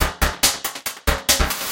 Spring Beats 4
Another processed analog drum loop made with white noise
140 bpm
analog, bass, beat, break, drum, drums, hat, hi, kick, loop, noise, snare